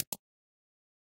a sound for a user interface in a game